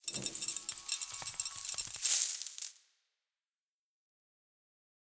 Coins spill recorded with Zoom H5. Suitable for mobile games